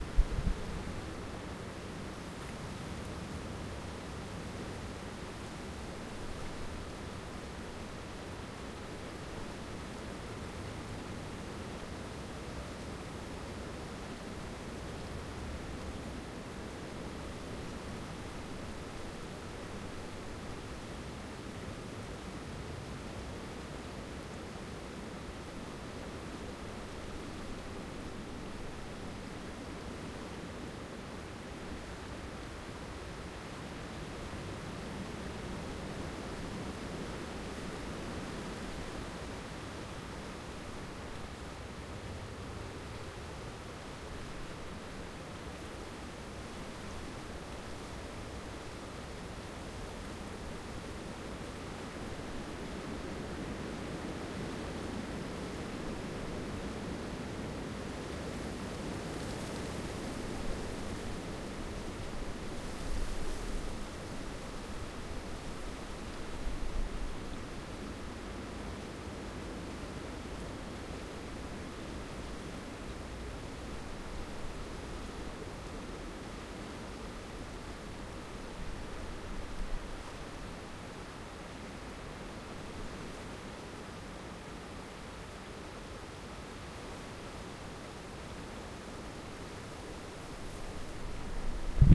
Wind in trees2
Wind in trees, gusting, leaves blowing
field-recording, forest, wind